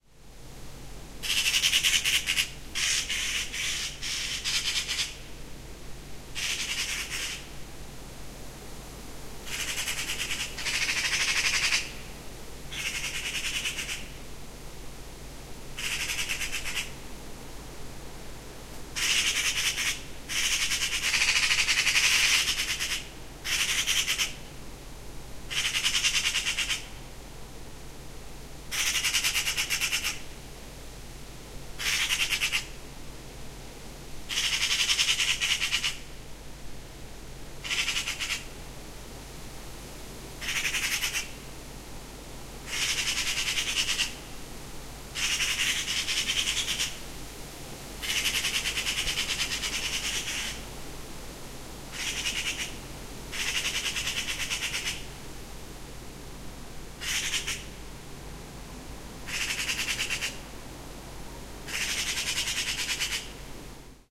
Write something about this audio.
bird call at night
Bird call recorded at night.
bird, bird-call, dr-100, field-recording, night, outdoor, tascam